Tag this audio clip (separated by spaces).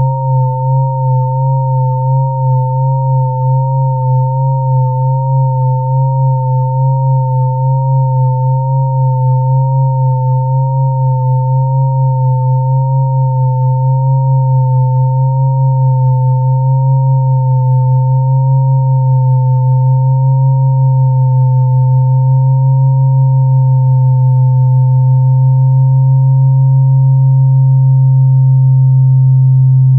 bell pad